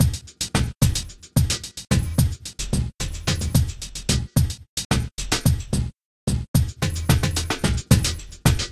dnb 10 BREAK3
Simple Drum and Bass pattern template.